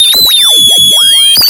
Mute Synth Fake Shortwave 003
Fake shortwave sounds from the Mute Synth
shortwave, short-wave, Mute-Synth